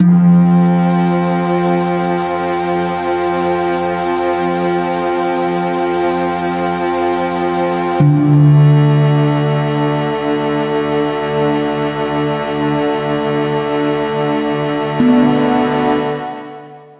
harping around
A reverbed harp with a dark feel
scary, harp, dark, horror